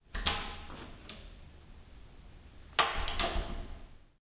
Door open and close recorded in 1 meter distance
MD Sony recorded with Sony MD Stereorecoder
Fieldrecorder, Feldaufnahme, Atmos, Ambi, atmosphere, Atmosphäre, Sony Microfons Mikrofone, Sony MD Recorder